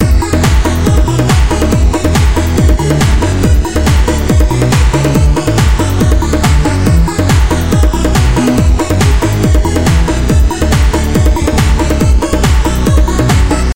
Just like the filename says. Just like flick3r, I have gotten to be a fan of this guy's works. M-Red is awesome, so I felt like remixing one of his loops, so here it is. 8-bar loop, 140BPM
This is going into an original song if I can ever finish it.
bpm, dream, loop, m-red, remix